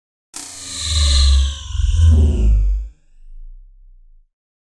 SPACE SHIP FLY-BY. Outer world sound effect produced using the excellent 'KtGranulator' vst effect by Koen of smartelectronix.